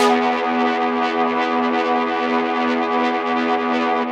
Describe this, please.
A nice pad experimental
pad, synth, ambient